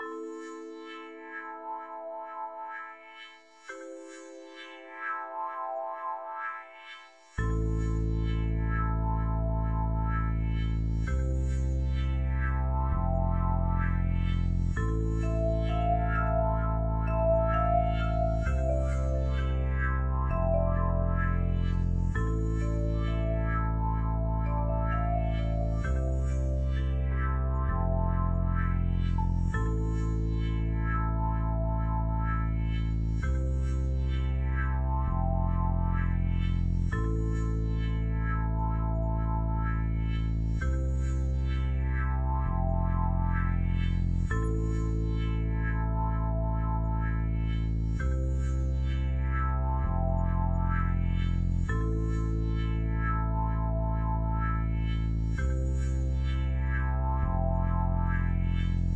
Created on Roland Fantom x6. It's always cool to hear how you've used it, also check out my podcast Ego Superstars available on iTunes.